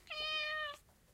Cat Meow 2
cat, meow